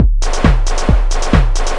Industrial CM-505 06 Full
loop, drums, beat, drum-loop